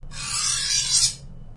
Knife on steel 1
Knife tapping on the lid of a tin for a common brand of breath mints.
home, household, knife, metal, percussion, sampler, scrape, short, steel, tap